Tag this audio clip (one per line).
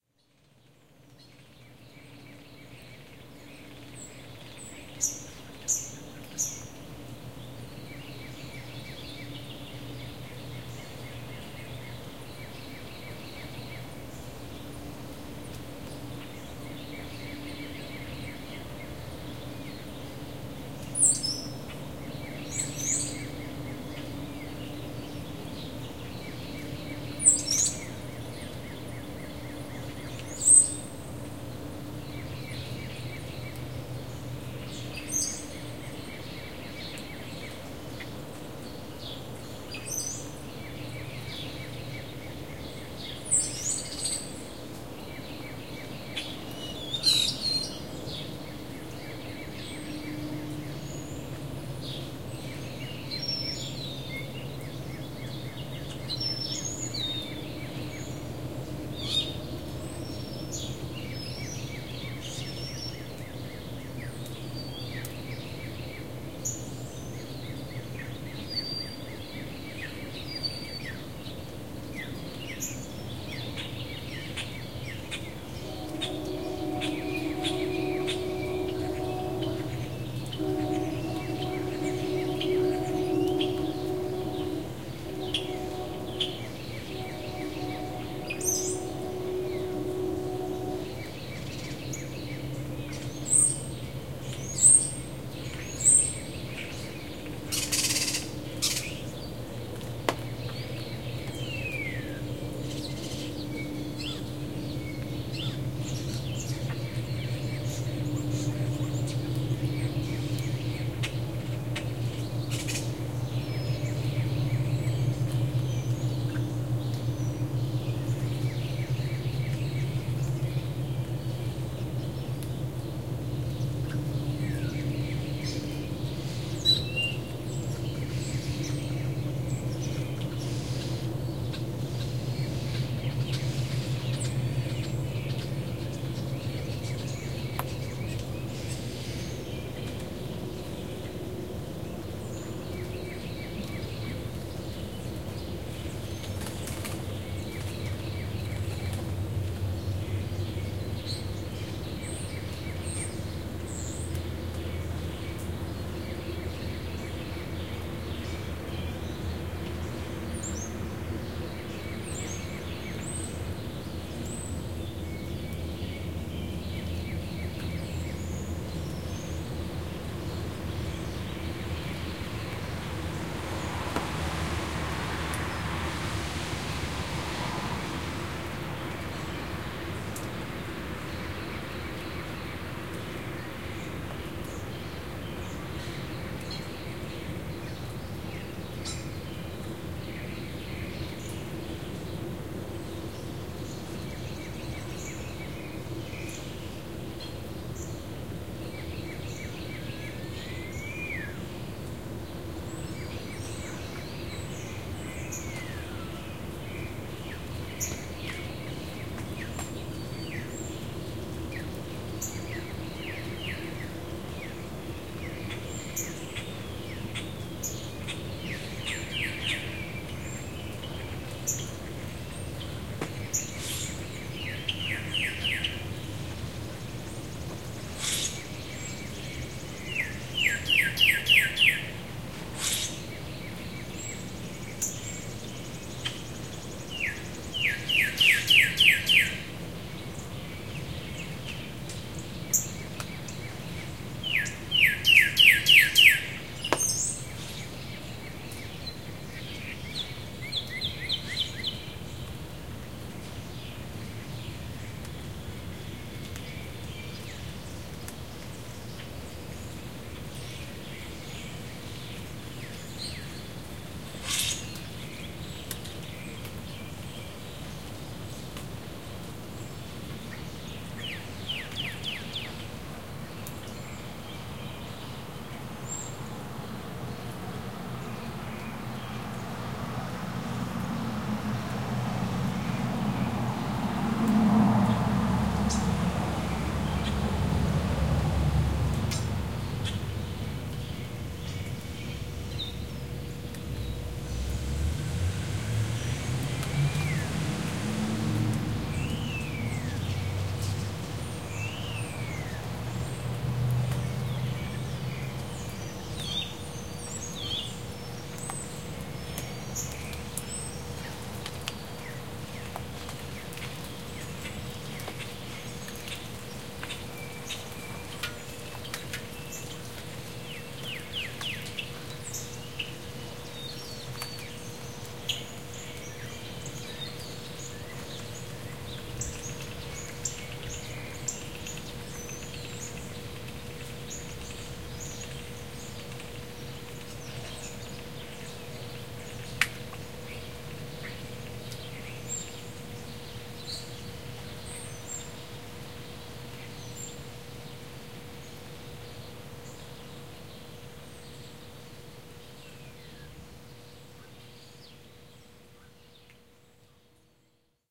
spring
town-sounds
birds
field-recording
morning
waking-up
april-morning
starling